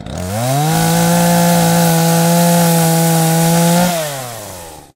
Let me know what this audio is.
Chainsaw Cut
Field recording on an 18" 2-stroke gas chainsaw.
saw, cutting, chainsaw, motor, cut, chain, gas